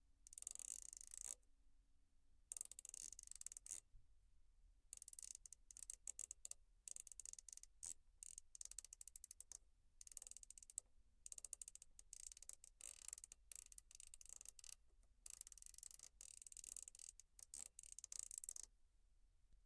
spinning volume knob on car radio